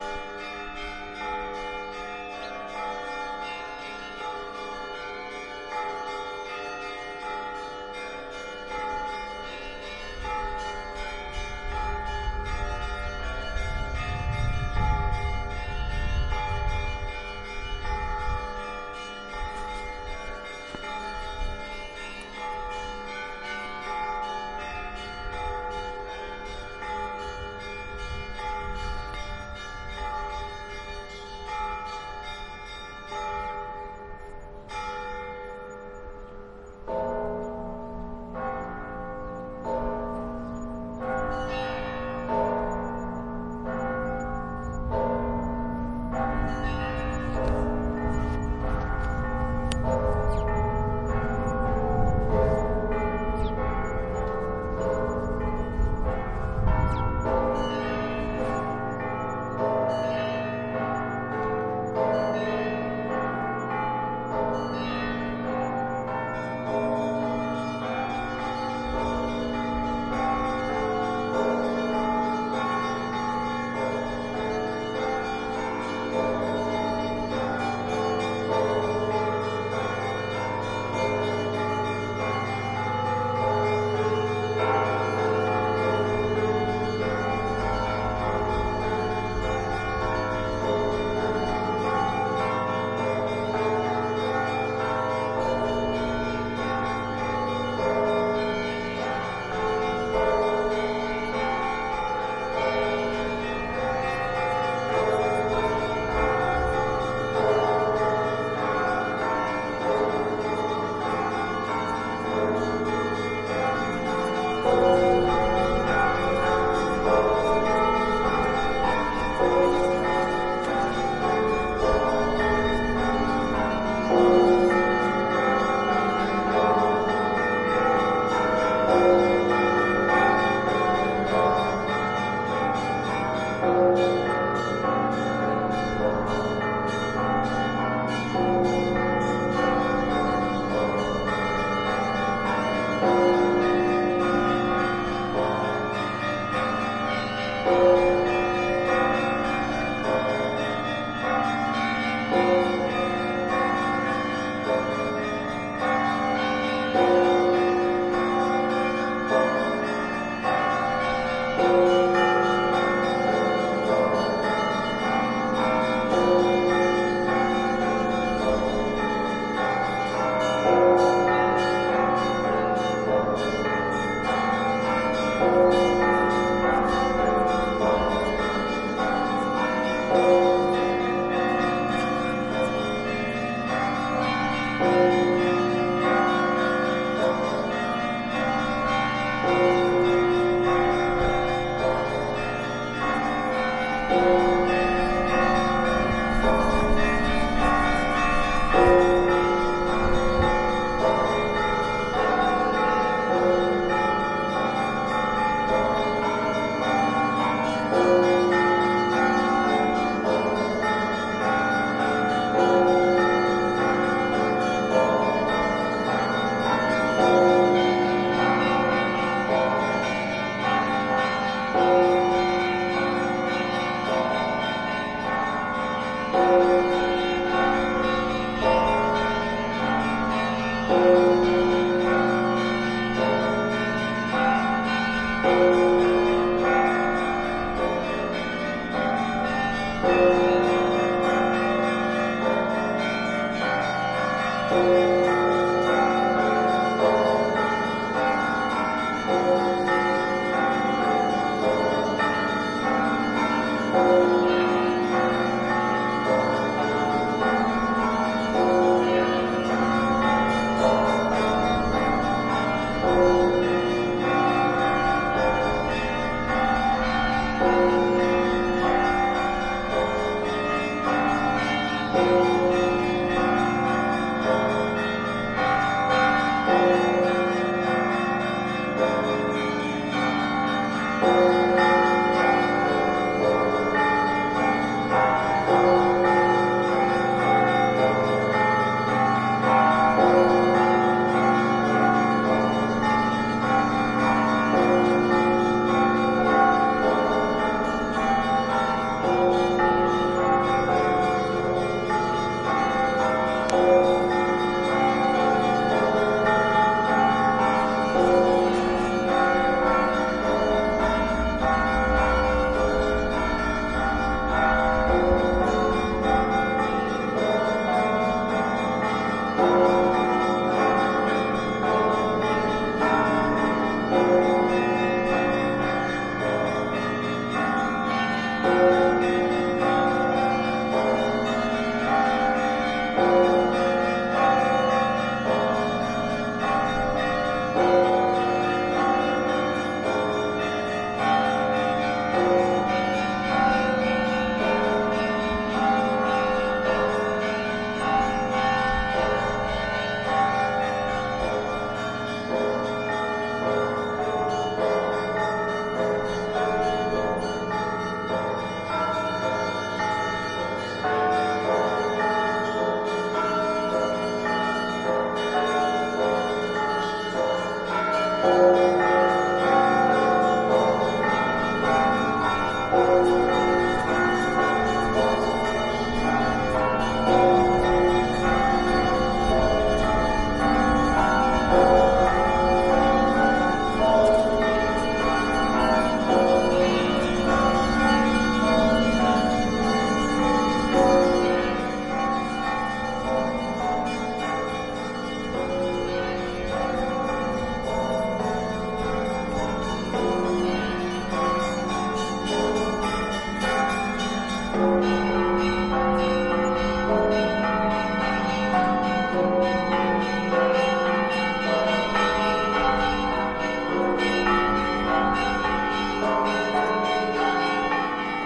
church bells (raw mid-side)
warining, unprocessed raw mid-side recording. some wind noise and rustles.
nearby russian orthodox church bells.
m, ringing, midside, bells, church, russian, campanology